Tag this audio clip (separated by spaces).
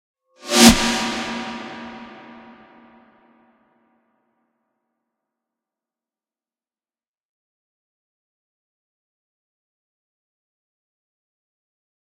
FL electronic fx loop reverse snare studio synth tone